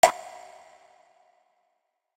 Single drop of liquid with slight reverb